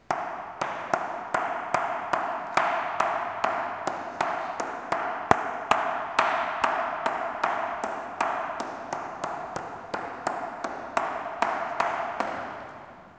Sounds recorded at Colégio João Paulo II school, Braga, Portugal.